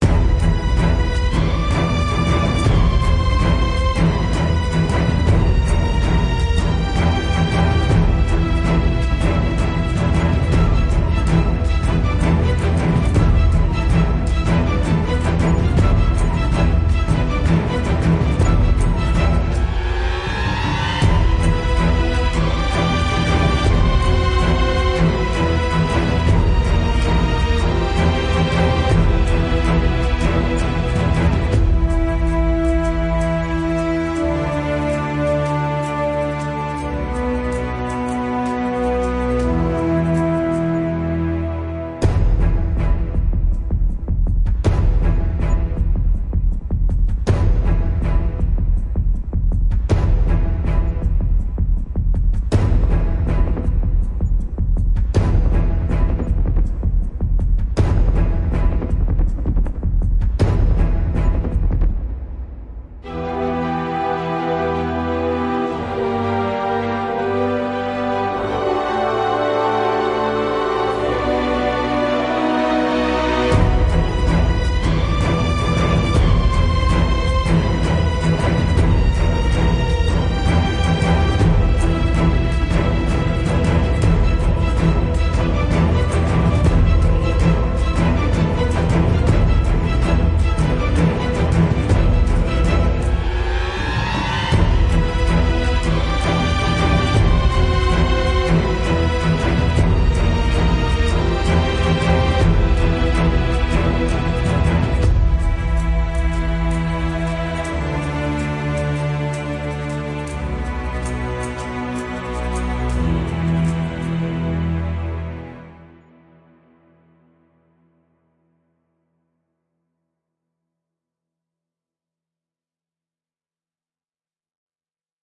Genre: Epic Orchestra.
Track: 67/100
Sorry that I'm out for a while, anyway I'm back.